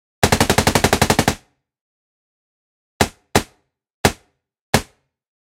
Dry Assault Rifle Automatic
Dry sound left without reverb. Made in ableton live by layering samples together and minor processing.
assault, gun, rifle, shoot, waeapon